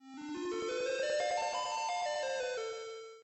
Retro Melodic Tune 17 Sound
Feels like a zelda game tune or something similar.
melodic, sound, sample, 8bit, school, computer, loop, old, effect, cool, original, retro, game, tune